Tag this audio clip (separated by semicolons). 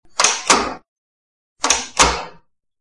turning; door; open; handle; doorknob; opening; doorhandle; Knob